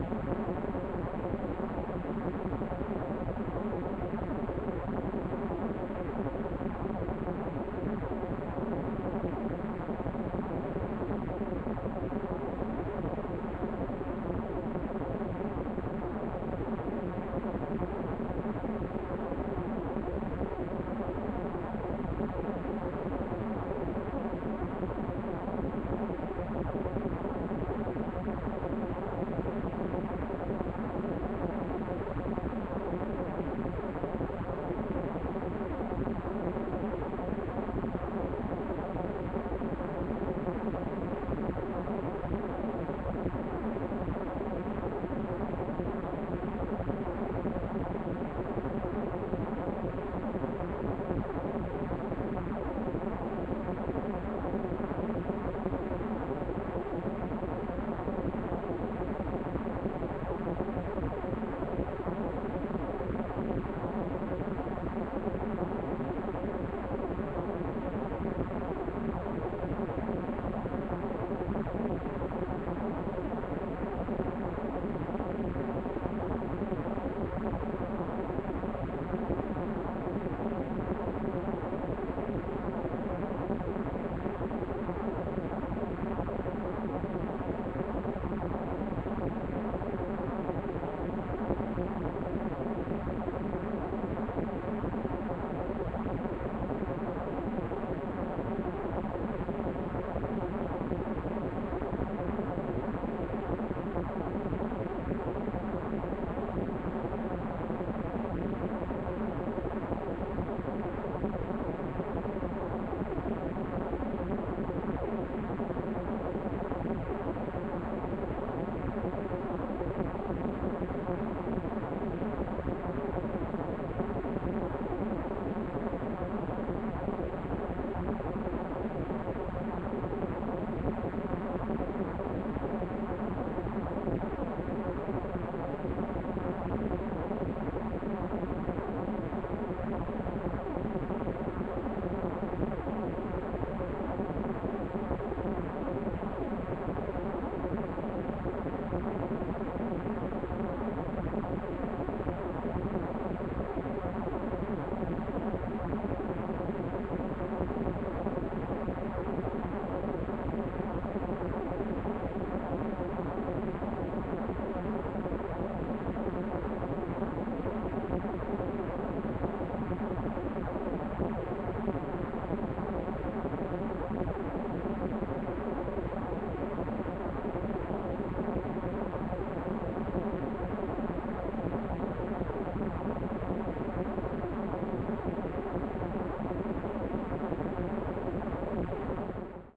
Short Circuit
Synth-generated loop for a short-circuit, lost communication, static, etc.
digital, sound-design, short, circuit, pad, glitchy, computer, communication, electronic, synth, lost, loop